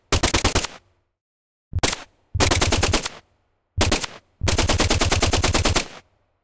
shoot gun shooting shot weapon firing military warfare army war rifle attack pistol sniper shooter fire machinegun